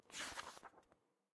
Soft Cover Book Open 1
Book, Open, Page, Paper, Soft-Cover-Book